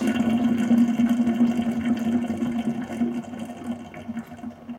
sometimes the sink of my kitchen makes this noise when the washing machine is working
emptying, sink, water, drain